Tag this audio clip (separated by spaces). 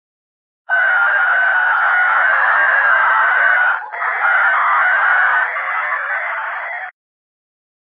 convolution fx noise processed